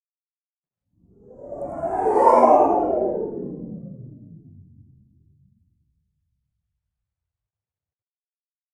CP Sci-Fi Ship Pass 03
A spaceship flyby. A little doppler shift in there. A little reminiscent of a tie fighter.
sci-fi, spaceship, swish, whoosh